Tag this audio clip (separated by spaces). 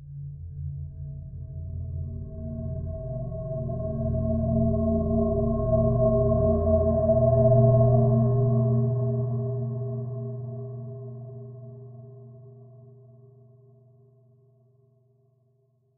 dee-m detuned horror string